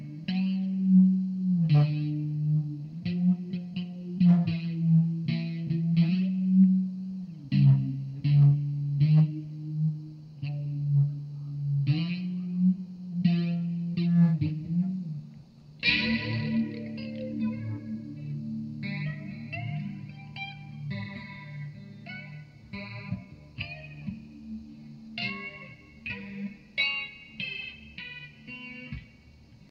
Alex Eliot plays guitar through the amp into the microphone.